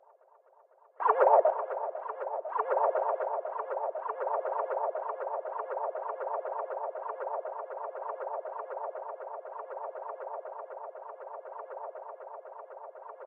Simple short sound played backwards with a Delay Effect to sound like a typical Cassette Crash - But more musical Created with biuldin Analog Delay from Msuic Studio.
Damaged, Delayfx